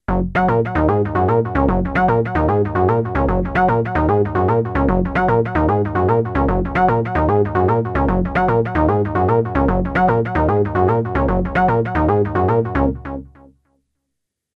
digibass loop triplet arpeggio 150bpm
reminds me of something by Dr Alex Patterson. ambient ahoy.
in triple time.
bassloop, ambient, orb, bass, bass-loop, triple-time, fluffy